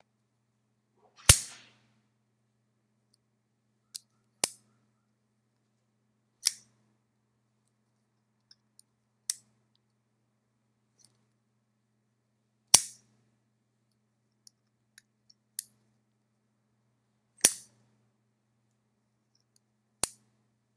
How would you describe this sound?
Switch Knife Flick and Put Away
Used Audacity to record. I flicked a switch knife in front of my computer and put the blade back in it's holder several times.